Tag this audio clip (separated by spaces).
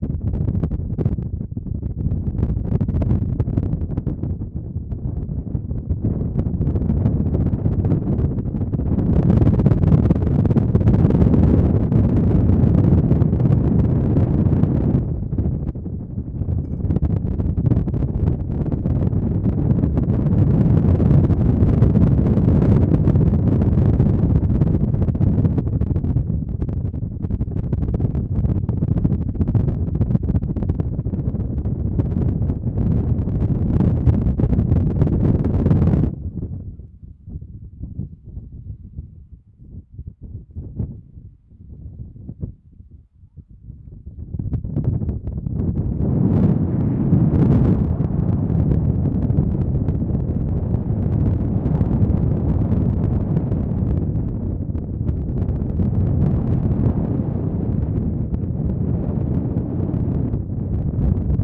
clippedlife dr-40 field-recording porto tascam wind